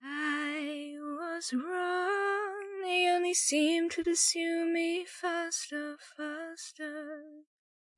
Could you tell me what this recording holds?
The exact same as the other vocals (see its title for the lyrics) except cleaned WITHOUT reverb (by Erokia).